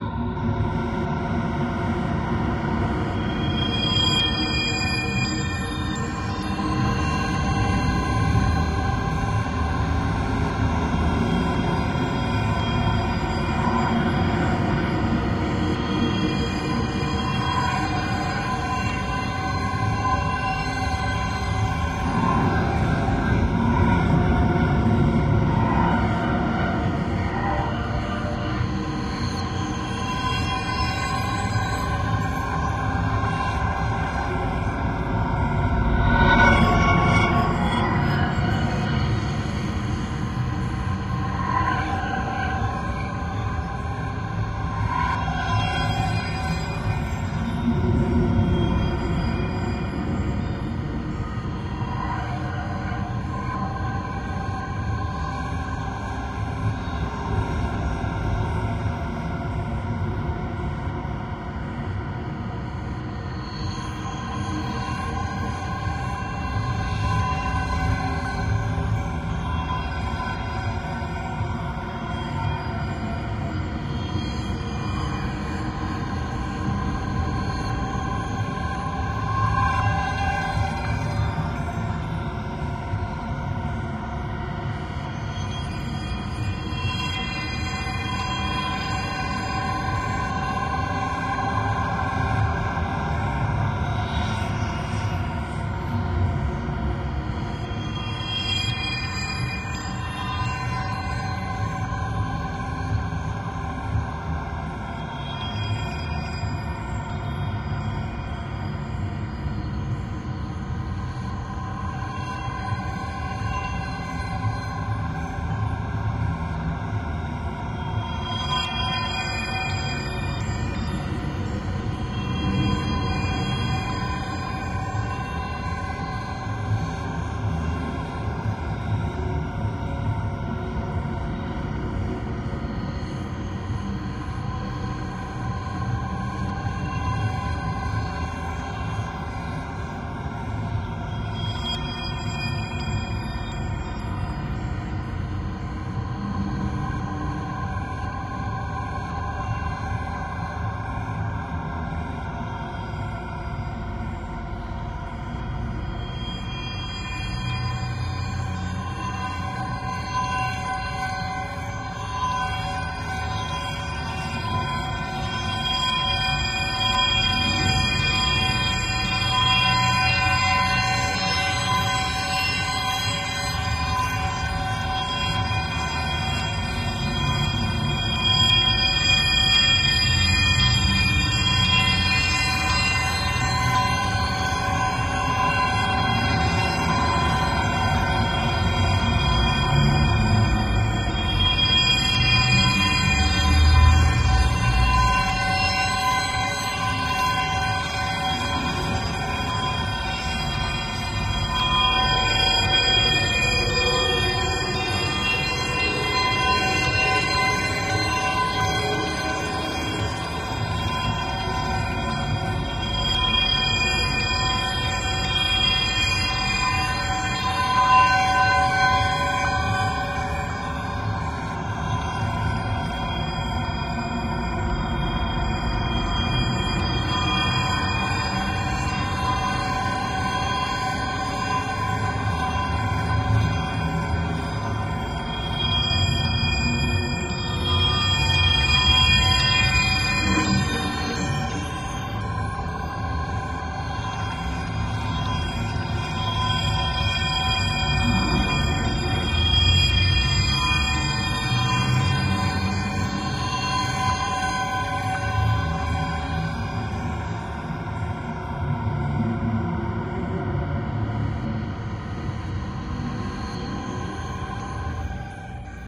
Wind chimes - Sound Design
Recording of windchimes and then processed in software.
Windchimes, Atmospheric, Soundscape